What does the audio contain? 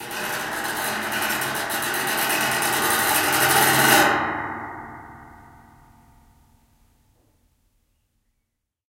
Kriselige Bedrohung
My "Falltuer Samplepack" deals with the mysterious sounds i recorded from the door to the loft of our appartement :O
The Recordings are made with a Tascam DR-05 in Stereo. I added a low- and hipass and some fadeouts to make the sounds more enjoyable but apart from that it's raw
haunted, mysterious, metall, dr-05, ghosts, atmospheric, trapdoor, dramatic, stereo, creepy, scary, spooky, phantom, tascam, dynamic